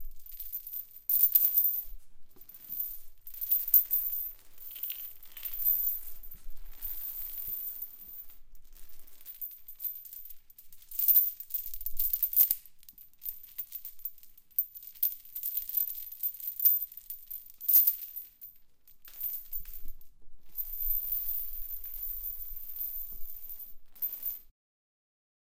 Sound effect of a metal chain being dragged and shaken, different speeds and aggression in chain sound.